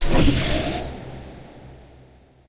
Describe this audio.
Shields power up sound created for a game built in the IDGA 48 hour game making competition. The effect was constructed from a heavily processed recording of a car door strut recorded with a pair of Behringer C2's into a PMD660.